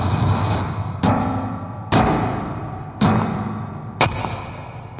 Techno percussion loop made from samples and processed through a real analog spring reverb. Suitable for electronic music like dark-techno, dub-techno, house, ambient, dub-step.